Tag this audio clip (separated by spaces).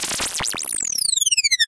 sweep ufo